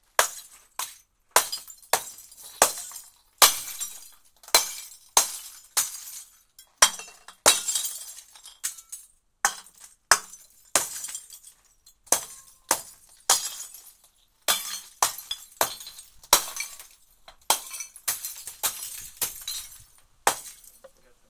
Bottle Smashes Hammer Hits Finale FF231
Quick breaking bottles, hammer, liquid, shattering glass, medium to high pitch, tinging, falling glass.
breaking-glass, glass-shattering, Bottle-smashing